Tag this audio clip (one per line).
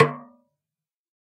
1-shot
multisample
snare
drum